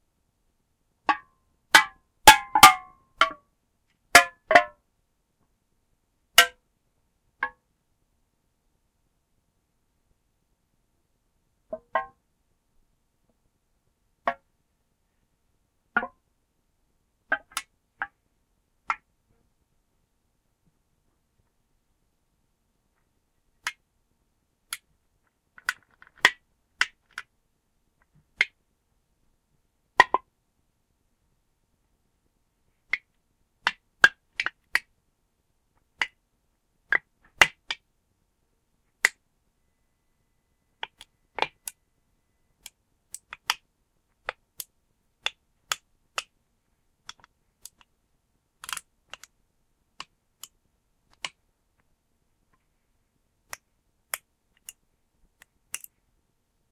Crushing soda can 01
Me crushing a soda can with a seat clamp.
bench, can, clamp, crinkle, crush, press, seat, smash, soda